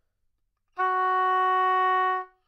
Part of the Good-sounds dataset of monophonic instrumental sounds.
instrument::oboe
note::F#
octave::4
midi note::54
good-sounds-id::7966
Fsharp4, multisample, single-note, oboe, neumann-U87, good-sounds